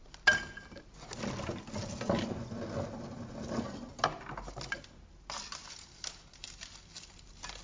Dropping wood into a box 02
Dropping wood into a box
Digital recorder - Audacity